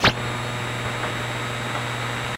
Sweeping the shortwave dial -- noise & tones filtered by changes in radio frequency.